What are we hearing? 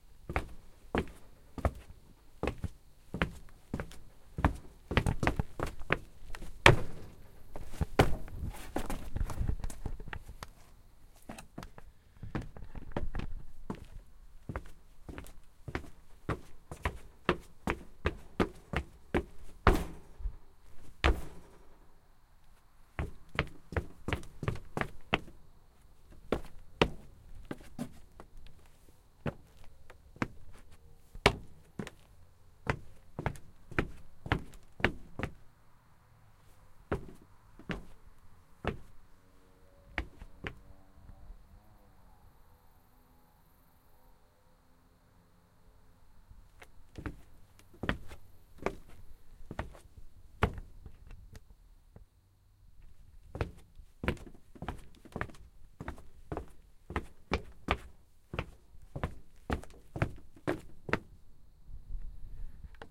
walk wood jump clunk boot
The sound of boots walking, running, jumping on wood
Use this for whatever you want, for free.
Find me here:
Extra credit: Alex Tavera
clunk, jump, hardwood, walk, footstep, click, step, foot, steps, wood, floor, walking, footsteps, shoe, boot, feet